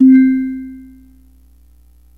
Fm Synth Tone 04